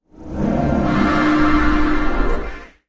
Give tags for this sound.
fx; voice

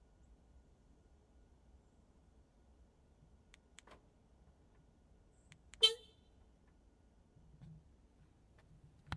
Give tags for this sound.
Car; alarm; Door; Lock; Beeps; Clicks

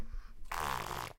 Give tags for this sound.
panska; cz; spitting; czech

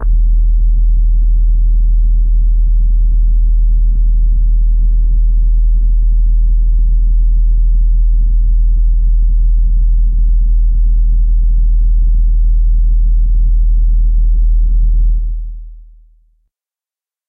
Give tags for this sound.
flying; horror; ambience; soob; spaceship; floating; backgroung; bass; electro; drone; experiment; soundtrack; score; suspence; rumble; ambient; pad; low; creepy; illbient; soundscape; spooky; boom; film; space; atmosphere; deep; dark; sub

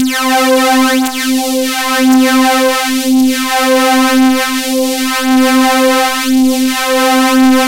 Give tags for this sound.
reese; saw

